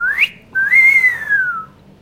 Whisle Romantic Recording at home